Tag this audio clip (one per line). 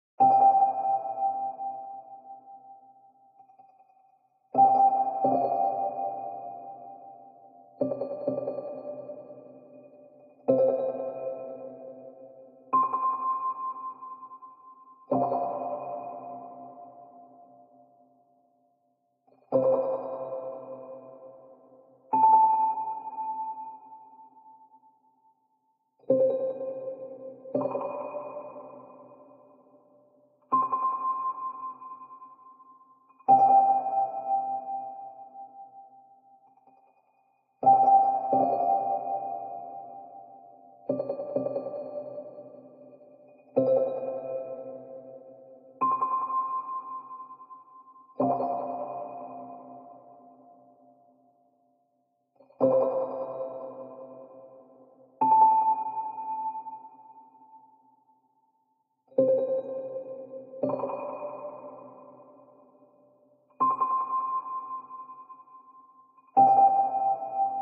Ableton
Synthesize